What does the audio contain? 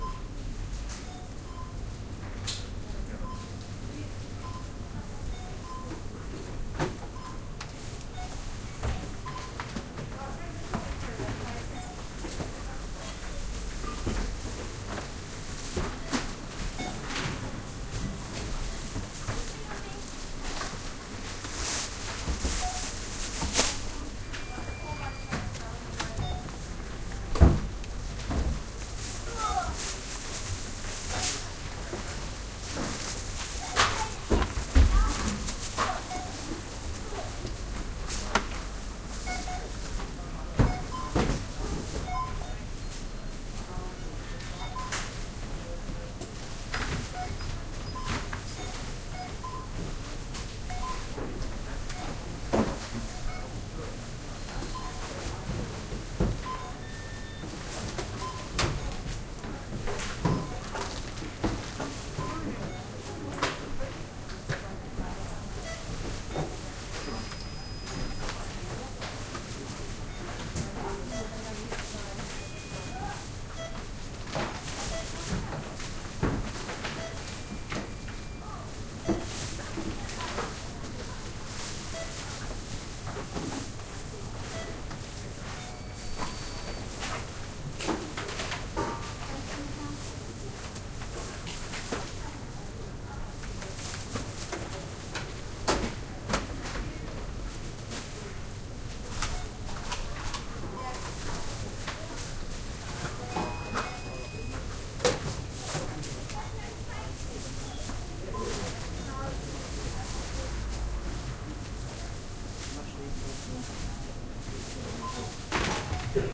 cm checkout
Binaural recording made at a South London supermarket, Autumn 2005. Home-made stealth binaural mic/headphones, Sony MZ-R37 Mini-Disc recorder.